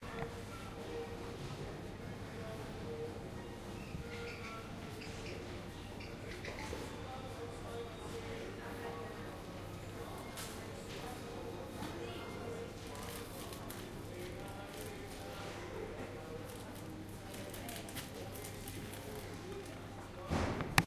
shopping mall, store, shopping, finland, buying,
buying, finland, mall, people, shop, shopping